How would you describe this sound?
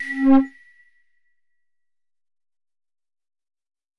Tonic Whistling Hihat FX
This is an electronic whistling hi-hat sample. It was created using the electronic VST instrument Micro Tonic from Sonic Charge. Ideal for constructing electronic drumloops...
drum, electronic